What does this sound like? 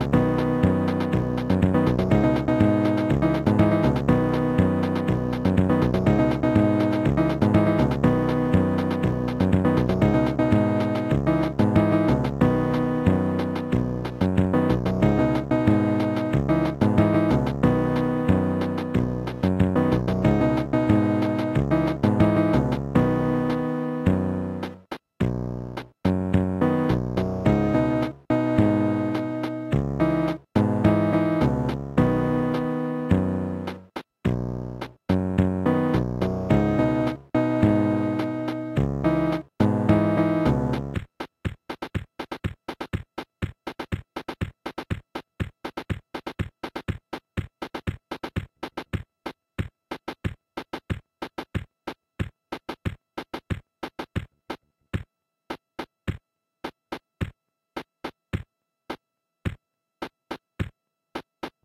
The accompaniment section from a cheap kids keyboard - the description doesn't really match the sound.
The accompaniment plays at three tempos followed by percussion only version of the same.
lo-fi, cheesy, accompaniment, disco, casiotone, auto-play, kitsch, fun, electronic